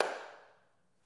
Snaps and claps recorded with a handheld recorder at the top of the stairs in a lively sounding house.